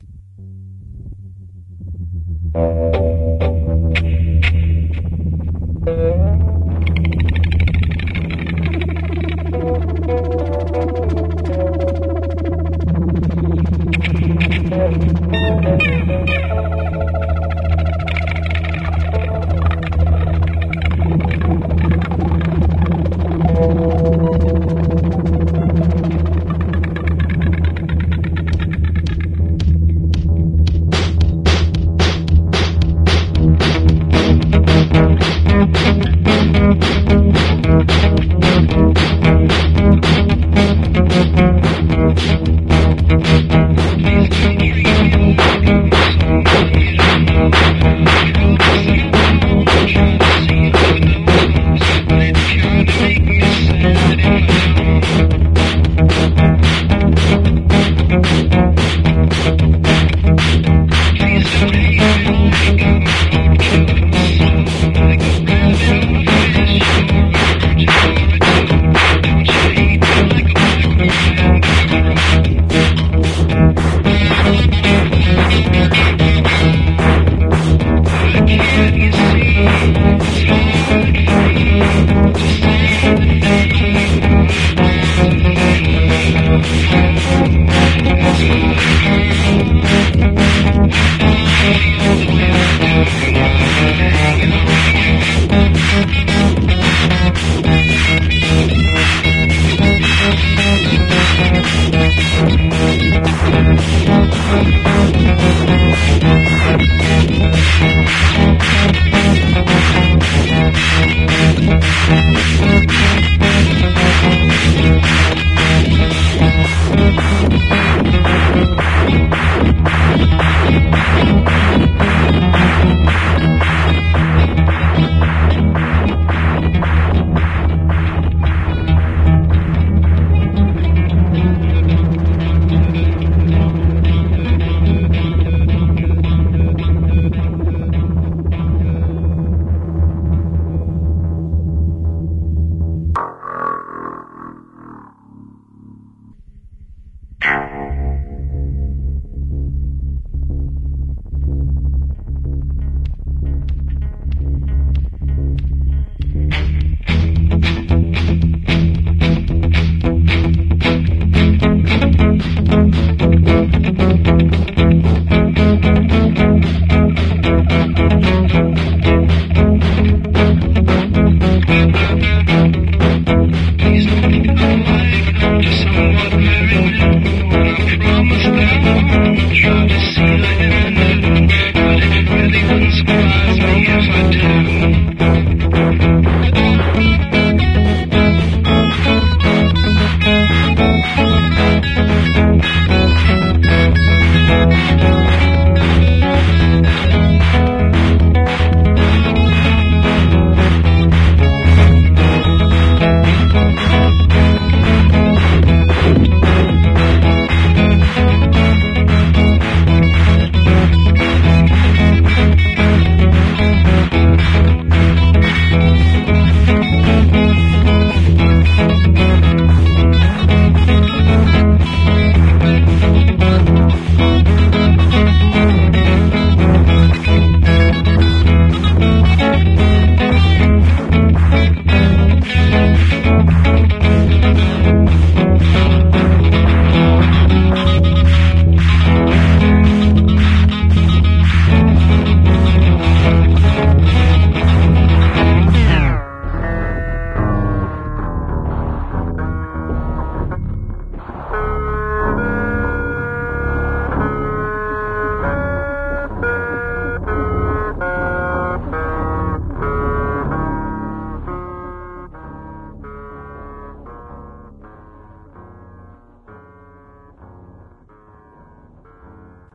blackbird and or crow
two parts ++ sampler feedback ++ bad mix
electro, mix, sampler